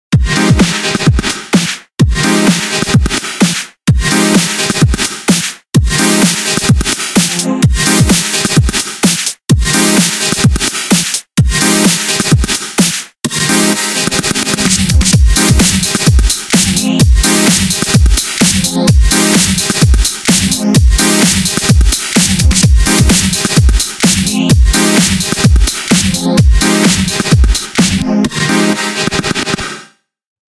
!SO HERE THEY ARE!
There is no theme set for genre's, just 1 minute or so for each loop, for you to do what ya like with :)
Thanks for all the emails from people using my loops. It honestly makes me the happiest guy to know people are using my sound for some cool vids. N1! :D
x=X